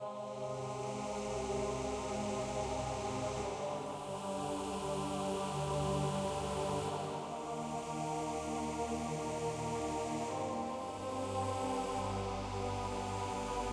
A choir sample I made.